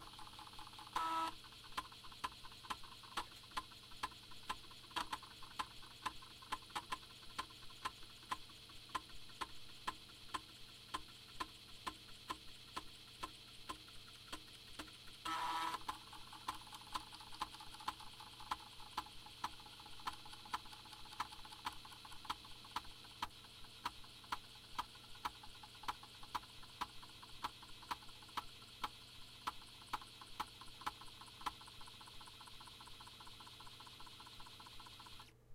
Floppy disk drive - read
A floppy disk drive reading data from a floppy disk. Recorded with a Zoom H1.